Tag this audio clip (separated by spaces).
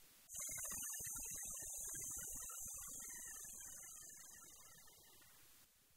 action down field-recording power-down machine electricity sfx shutdown recording power mechanical effect sound end